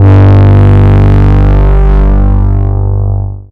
semiq bass hit
dnb, effect, dub, loop, dubstep, wobble